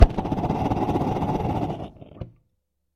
Gas furnace - Ignition happy
Gas furnace is ignited and starts to burn and sounds happy.
1bar, 80bpm, blacksmith, fire, flame, gas, ignition, metalwork